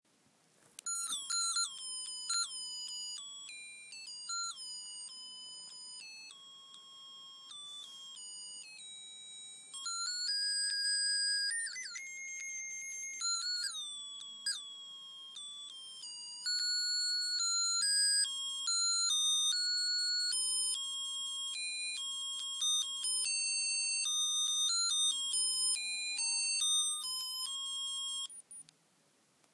I got this tie for Christmas and the battery was running out, recorded how it sounds.
christmas, field-recording